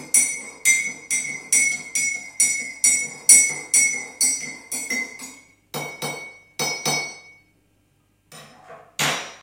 stirring water in cup
stirring, water